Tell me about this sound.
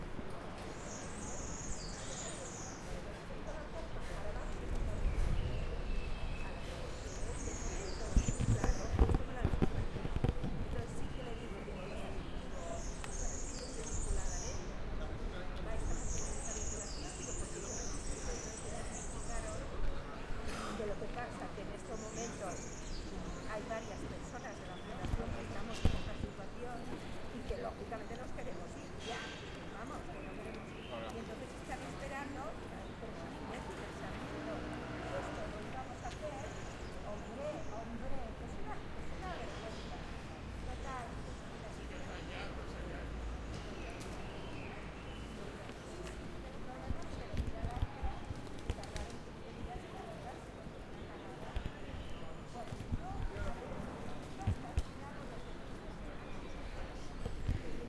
collab-20210224 ResidenciaRamonLlull Humans Transit Quiet Nice
Urban Ambience Recording at Residencia Ramon Llull, Barcelona, February 2021. Using a Zoom H-1 Recorder.
Transit, Nice, ResidenciaRamonLlull, Humans